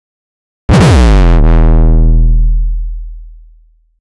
HK sawnOD Fsharp3
bass-drum, f-sharp, hardstyle, kick, kickdrum, overdrive, percussion, saw